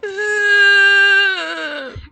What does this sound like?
making a groan that sounds like something dying
groan, moan, dying, die, death